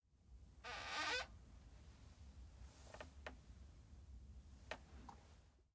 Squeaking Chair
The sound of a chair squeaking as someone leans forward.
foley, squeaking, chair